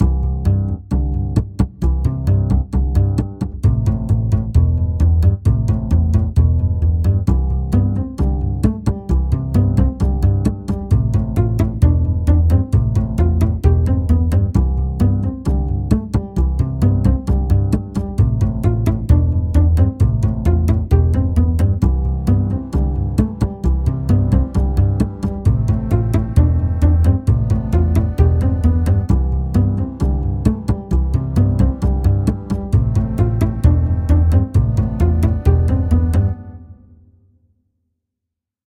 Merx (Market Song)
This is a song designed to augment the bustle of a market, town, or village-center. It is busy, but not intrusive and could be looped.
merchant, theme, video-game-music, video-game, market, fun, background